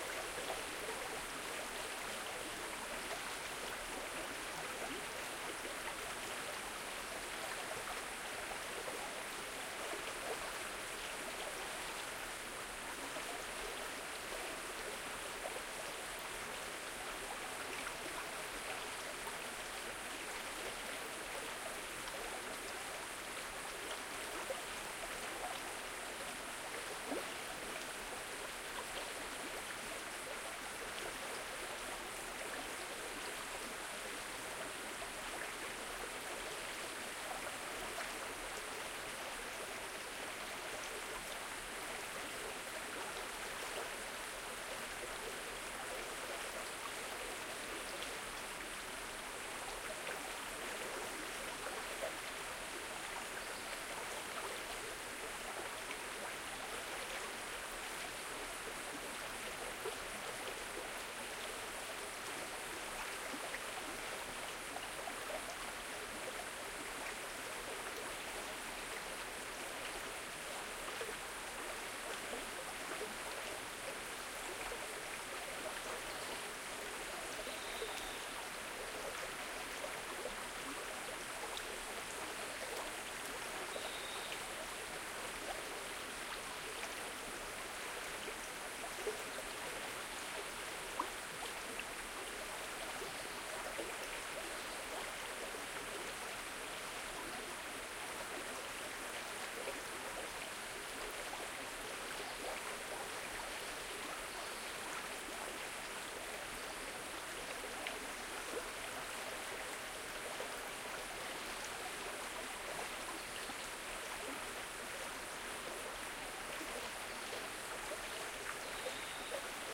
Recording of stream sounds using an Edirol R09HR with Sound Professionals Binaural mics positioned on trees to create a stereo baffle.